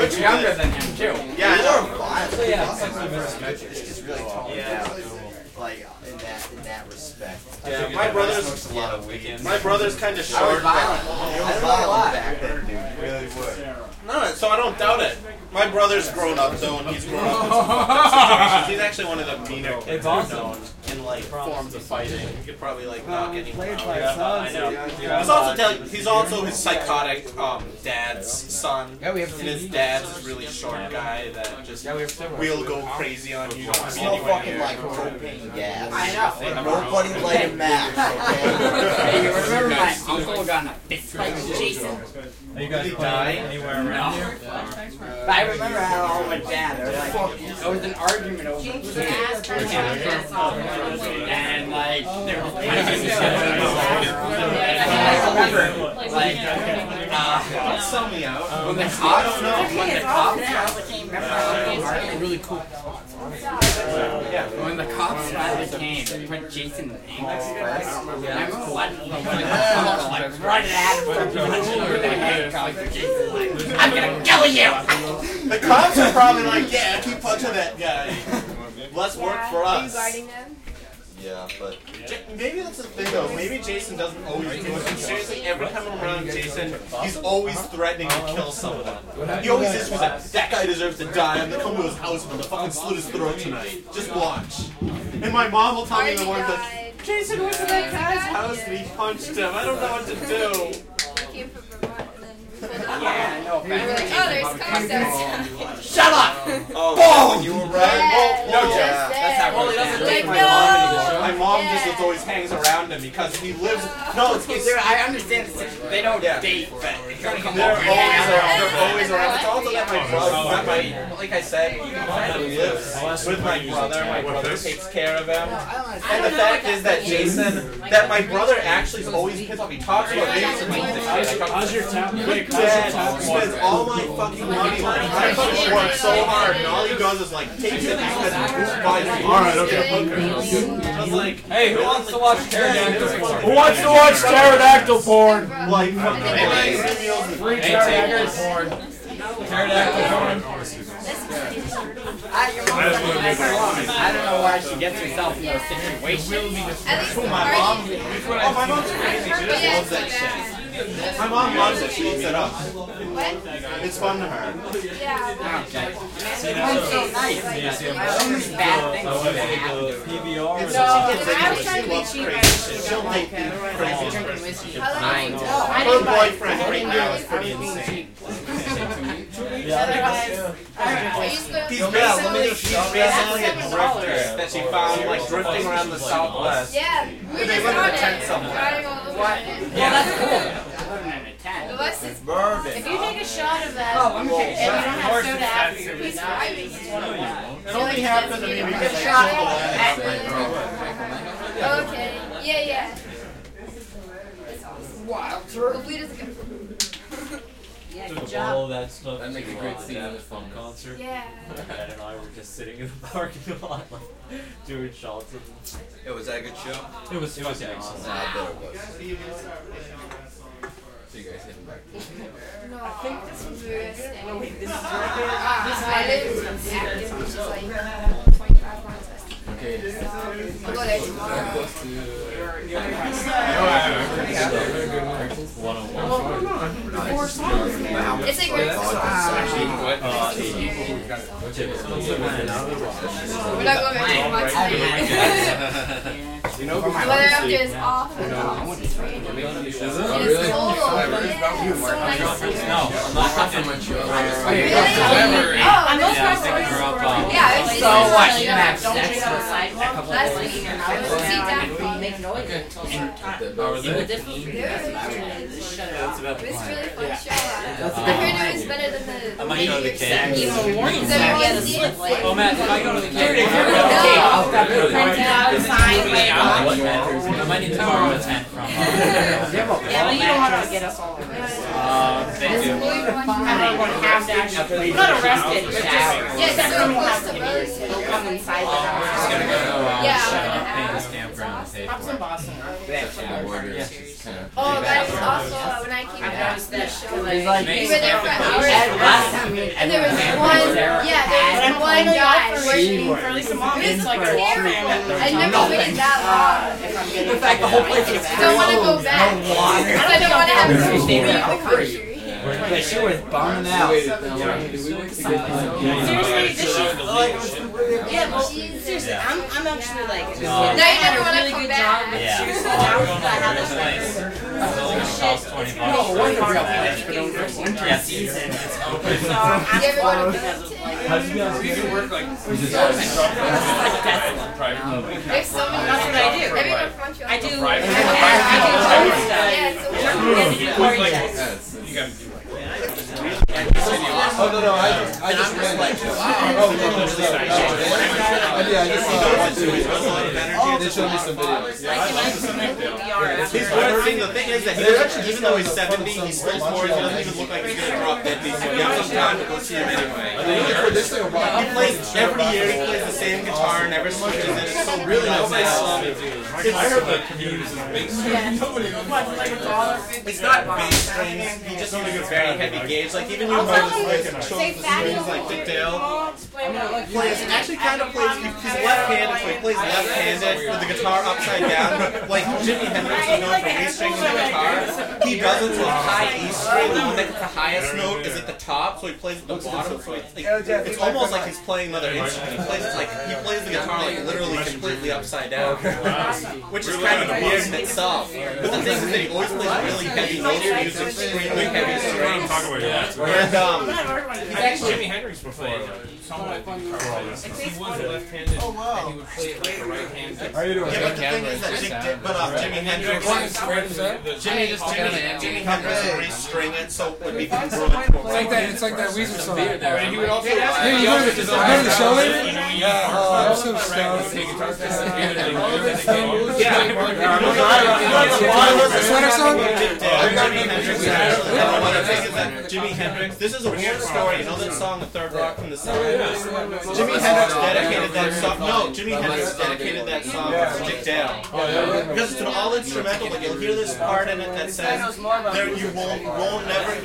crowd int small group young people kitchen party
int, group, young, people, crowd, kitchen, small, party